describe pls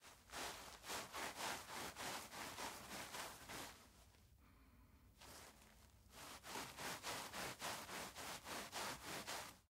Clothes Shuffling; Near
Clothes shuffling together.
cloth, clothes, fabric, movement, rustle, rustling, shuffle